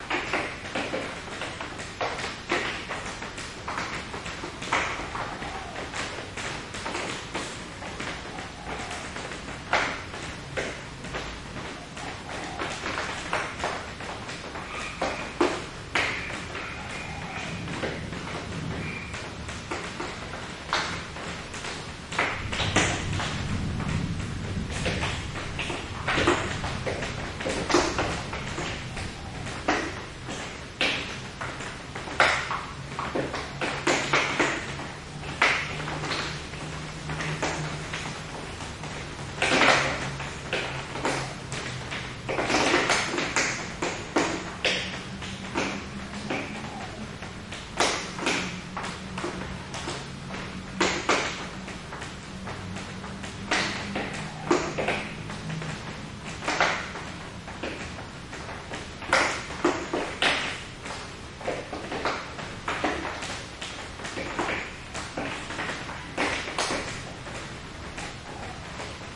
20141026 Bangkok House Rain 03
light rain at my Bangkok house recorded with a pair of Shure SM58.
thunder, rain